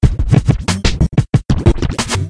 92bpm QLD-SKQQL Scratchin Like The Koala - 009
record-scratch, turntablism